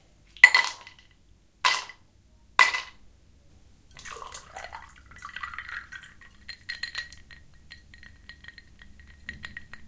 shaken.not.stirred
ice cubes that fall inside a glass, pouring of liquid (water) , and the
sound of the drink as you move the glass. Recorded with pair of
Soundman OKM microphones just set on the table /cubos de hielo cayendo en un vaso, se vierte un liquido, y sonido de la bebida al moverla